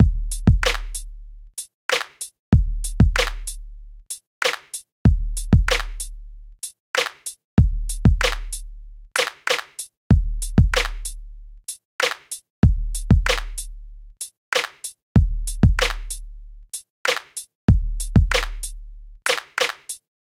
Hip Hop Drum Loop 05
Great for Hip Hop music producers.